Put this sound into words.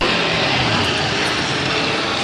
Loopable snippets of boardwalk and various other Ocean City noises.